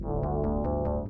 samples i made with my Korg Volca FM
synth, korg, hardware, volca, fm, modulation, sample, frequency